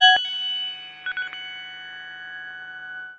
PPG 009 Noisy Digital Octaver G#3
This sample is part of the "PPG
MULTISAMPLE 009 Noisy Digital Octaver" sample pack. It is a digital
sound effect that has some repetitions with a pitch that is one octave
higher. In the sample pack there are 16 samples evenly spread across 5
octaves (C1 till C6). The note in the sample name (C, E or G#) does
indicate the pitch of the sound but the key on my keyboard. The sound
was created on the PPG VSTi. After that normalising and fades where applied within Cubase SX.
experimental, multisample, ppg